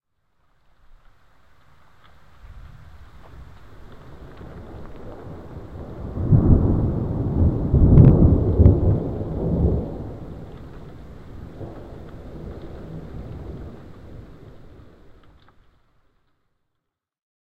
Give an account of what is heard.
Thunderrumble19august2007
lightning, field-recording, nature, storm, hunder, rainstorm, thunderstorm, rumble